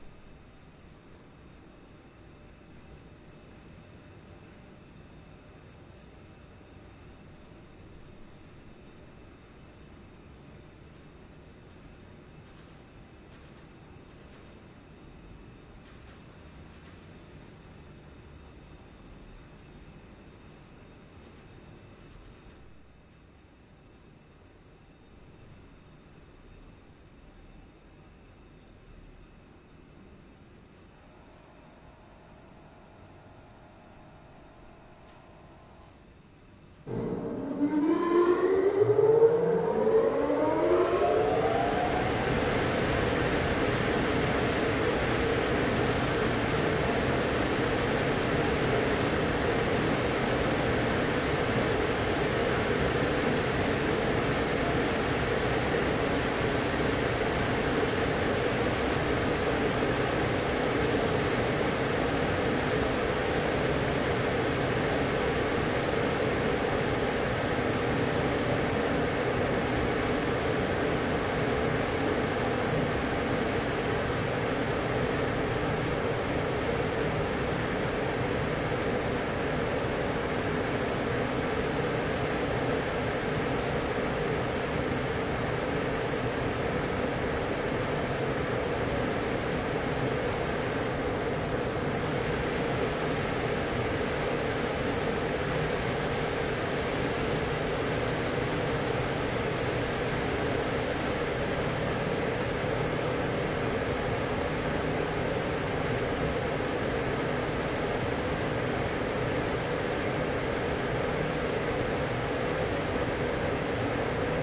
Strasbourg old municipal baths , the boiler starting up.
Stéreo Schoeps ortf